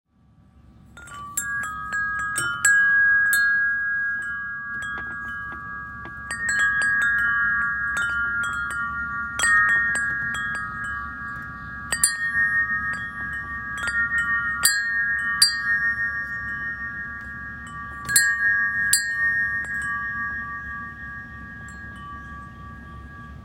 wind chimes
foley, sfx, sounddesign